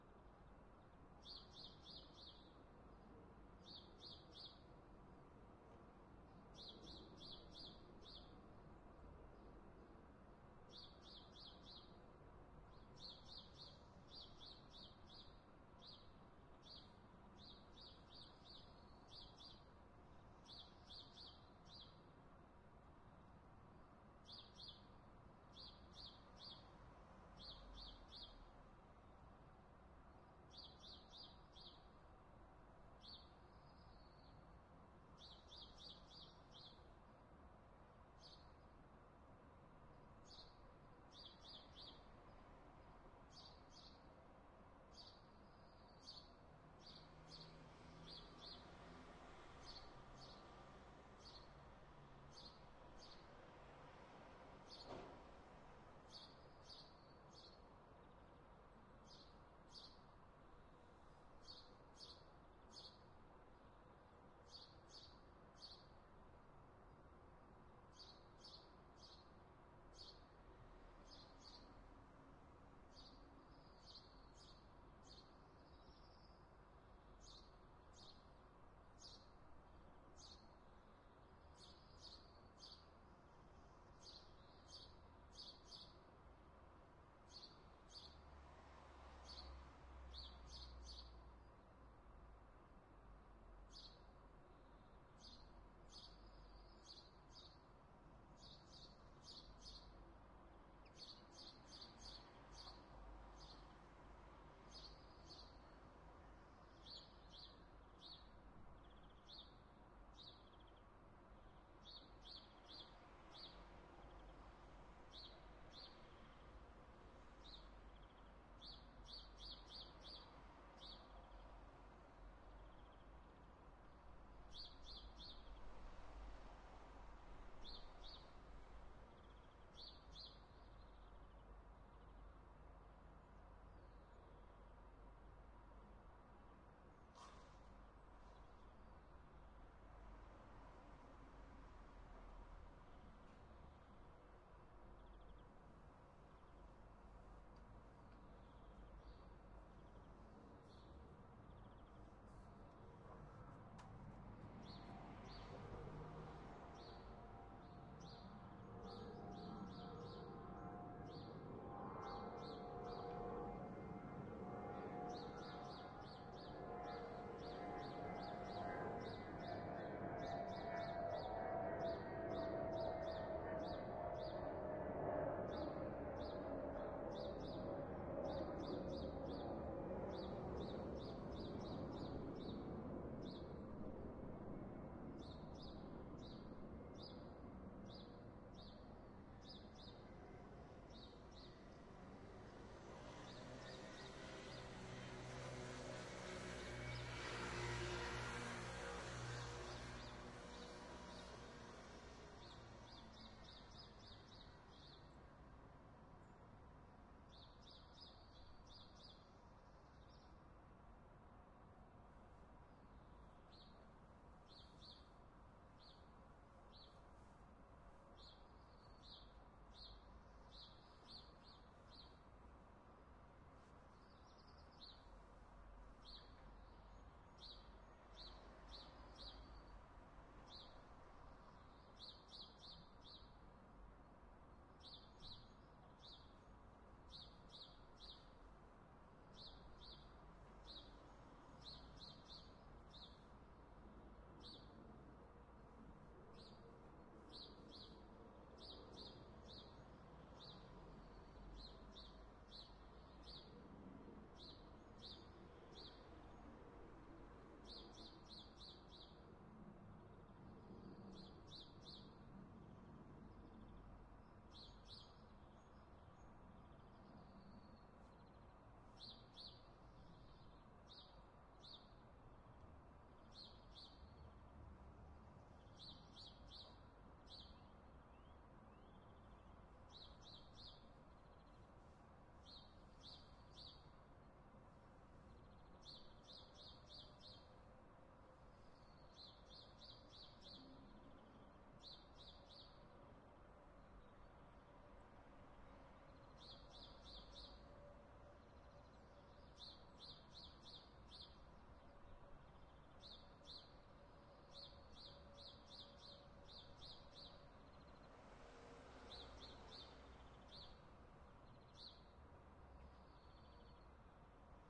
090403 00 neu-isenburg soundscape birds cars airplane
neu-isenburg soundscape recorded with Sony PCM-D50
airplane, birds, car, neu-isenburg, scooter, soundscape